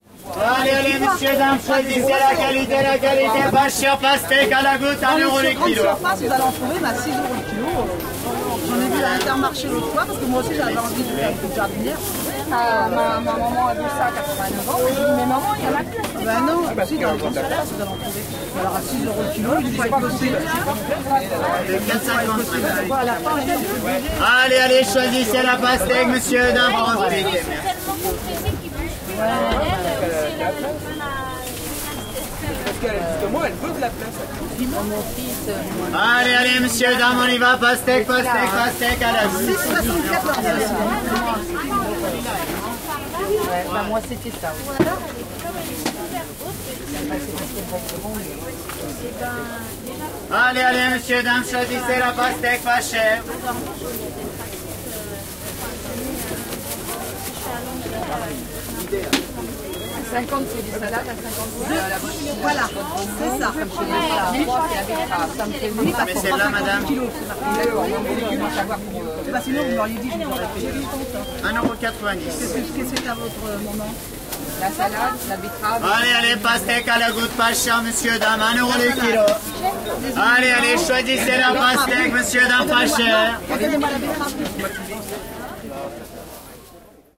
A market place outside of Paris, voices of buyers,fruits and vegetable sellers, typical french atmosphere. Recorded with a zoom h2n.